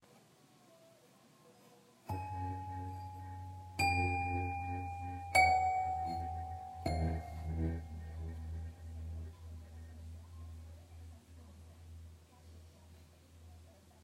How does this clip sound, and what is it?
I recorded this sound of the vibration of a toy piano after hitting the keys.